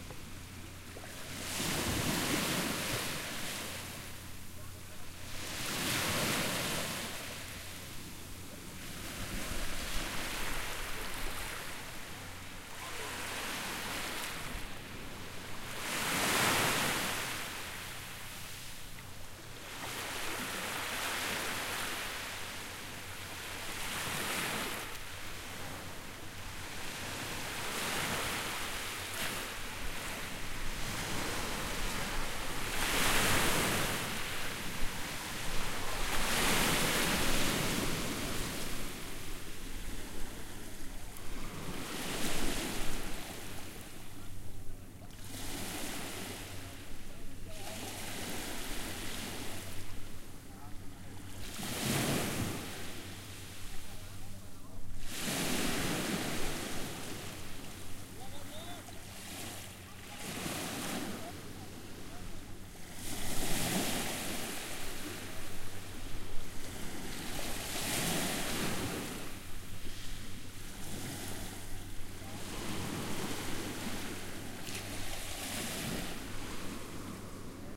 santorini waves 1
Recorded in Santorini, 10 m from the shore. You can hear some voices and some boat.
shore, santorini, boat